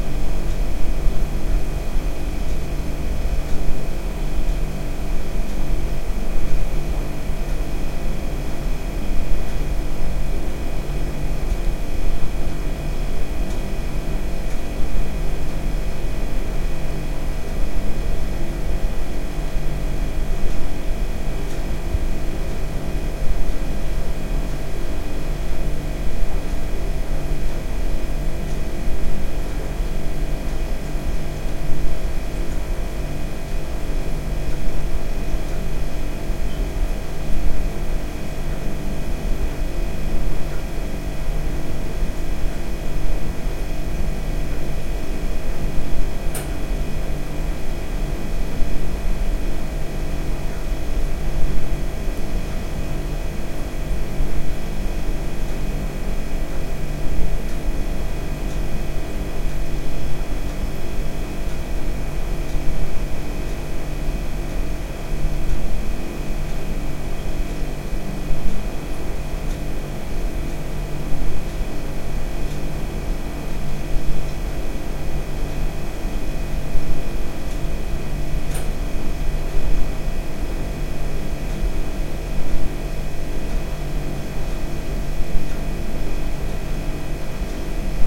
Kitchen ambience
An ambiance from the kitchen.